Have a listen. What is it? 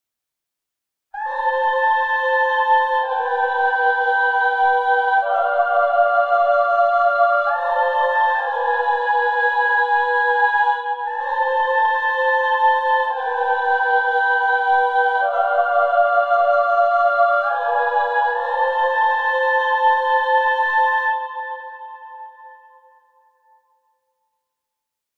Women Choir
I Played a simple cadence phrase with choir sound. First Women, then added men tenor and men base, then together all in one pack. Done in Music studio.
cathedral, choir, women, women-choir